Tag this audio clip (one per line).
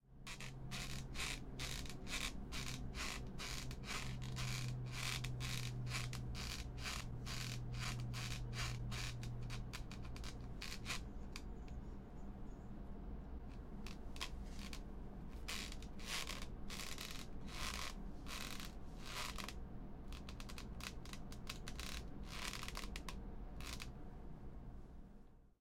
chair
swing
wood